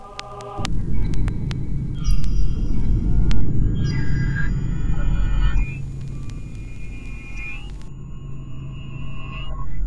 2-bar loop created with Audiomulch
2-bar, ambient, click, electronic, hiss, industrial, pad, processed, sound-design, sustained